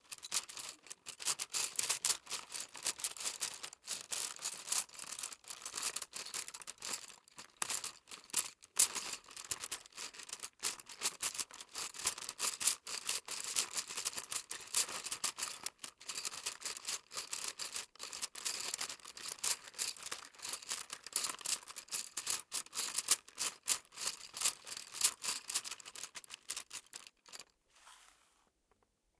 mySound Piramide Nil
Sounds from objects that are beloved to the participant pupils at the Piramide school, Ghent. The source of the sounds has to be guessed.
pencil-box, BE-Piramide